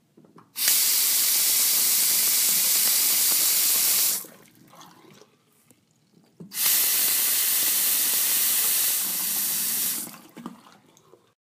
Faucet Running Water
Turning on a sink and letting water flow.
faucet, liquid, water, bathroom, sink